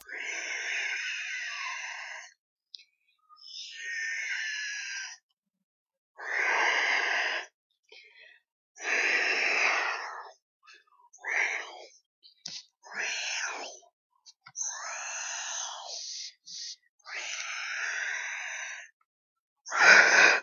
Xenomorph noises two
Alien, creepy, weird